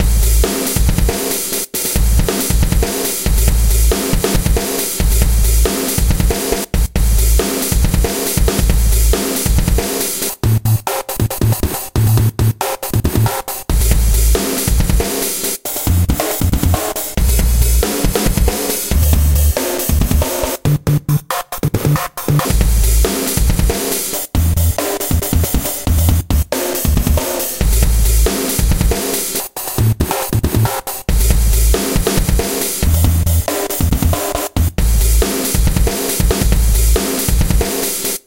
vst slicex combination recording with edison vst + pitch in real time.
Sampling/cut final with soundforge 7
beat, beats, breakbeat, breakbeats, drum, drum-loop, drums, jungle, loop, loops, quantized